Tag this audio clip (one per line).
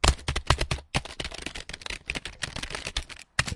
Essen
Germany